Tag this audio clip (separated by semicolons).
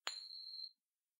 coin; dime; flic; flip; money; quarter; spinning